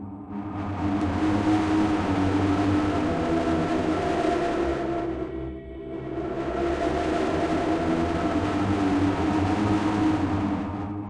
Yet another horror clip that i made. What your really hearing is me laughing, I did not need to do much to make it sound creepy as my sickness has affected my throat so much that it already sounds distorted.
Monkey, Madness, Moan, Psycho